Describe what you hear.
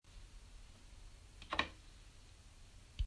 Button or Switch
A light switch in an old house